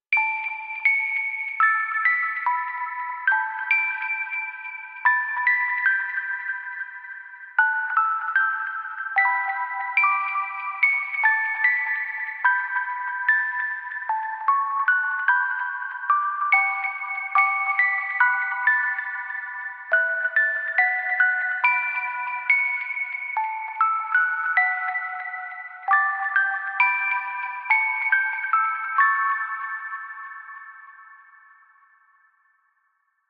a delicate melody
A random melody played on a Rhodes piano, transformed somewhat to sound like a music box.
creepy, children, piano, music-box, ambient, musicbox, rhodes, atmosphere